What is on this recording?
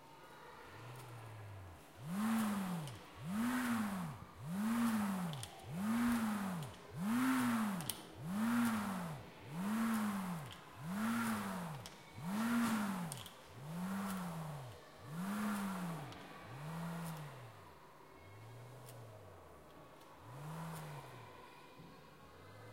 mySound JPPT6 Hugo
Sounds from objects that are beloved to the participant pupils at Colégio João Paulo II school, Braga, Portugal.